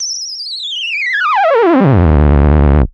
sonokids-omni 11
game, lol, analogue, ridicule, moog, soundesign, synthesizer, analog, comedy, electro, happy-new-ears, fun, sound-effect, sweep, funny, beep, fx, electronic, cartoon, filter, bleep, abstract, toy, synth, sonokids-omni